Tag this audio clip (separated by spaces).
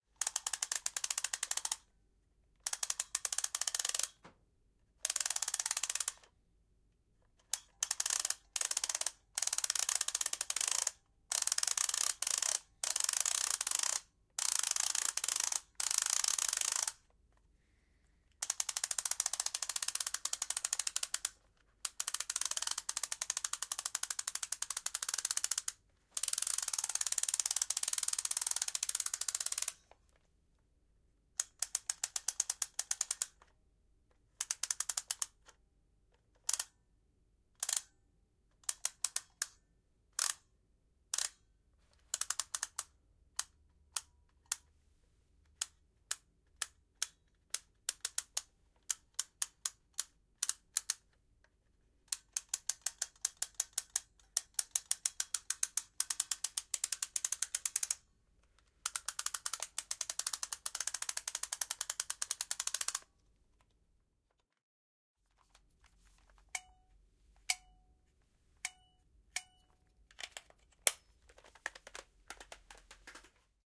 click clock crank mechanical turn wind